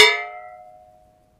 canpop16percussion
Pringle can recorded from inside and out for use as percussion and some sounds usable as impulse responses to give you that inside the pringle can sound that all the kids are doing these days.